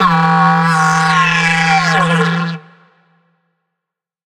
Dino Call 1
short didgeridoo "shot" with some reverb added. enjoy.
shot, some, reverb, added, enjoy, short, didgeridoo